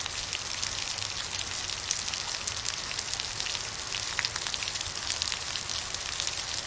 The sound of falling rain drops, seamlessly looped.